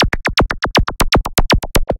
clickers 120 fx
This is a zappy break I made many years ago on my Akai XR10 drum machine. It's timing is 120 bpm.
120, click, fx, zappy